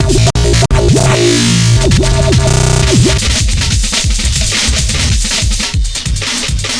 these are some rEmixes of hello_flowers, the ones here are all the screaming pack hit with some major reverb
cut in audacity, tone and pitch taken down and multiplied compressed,
and run through D.blue Glitch, (mainly a stretcher a pass a crush and
then a gate etc.) There are also some pads made from Massive.